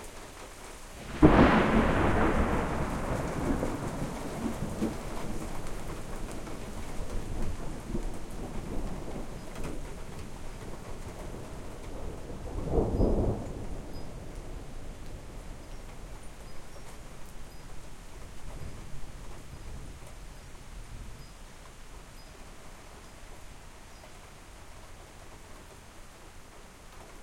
Large thunder clap
Equipment: Tascam DR-03 on-board mics w/ fur windscreen
A single, powerful clap of thunder.
EDIT: Uploading high quality samples like these, I would like to remind people that the preview quality will not accurately reflect that of the downloaded file.